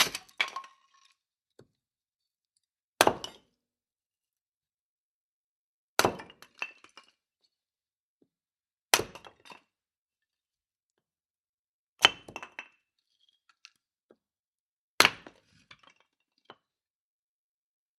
Four pieces of wood chopped with an axe.